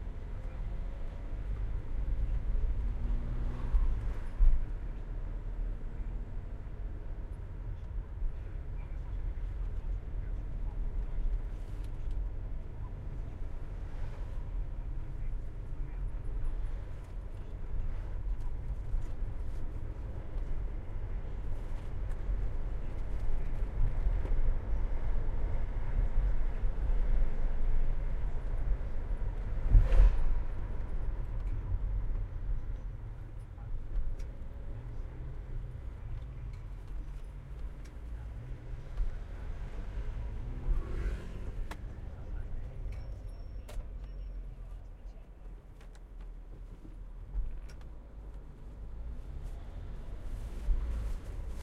Ambience INT car drive audi quattro light radio
INT, audi, light, quattro, car, Ambience, radio